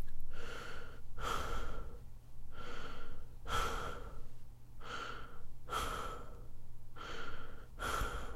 Male breathing
Myself breathing. Recorded in audacity with sE2200a condenser microphone.